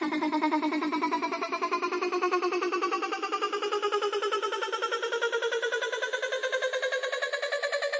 Vocal Chop Riser
Two octave riser in key of C made with granular synthesis from samples I got off this website :)